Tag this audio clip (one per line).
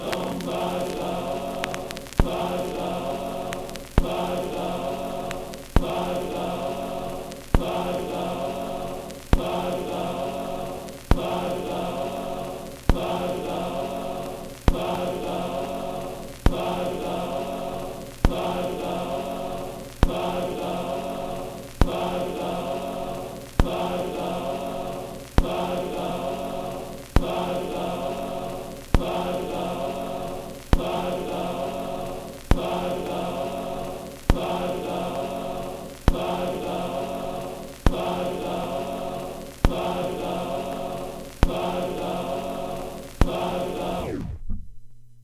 record
noise
vinyl